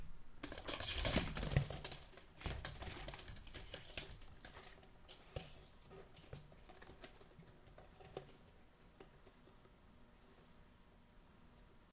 Nylon slats of a vertical blind recorded with laptop and USB microphone in the bedroom. If you have a pet what likes to look out windows, you know this sound all too well.
bedroom, blinds, foley, noises, vertical